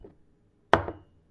Putting coffee mug to table, recorded with Zoom h1n.
Coffee, mug, table, wooden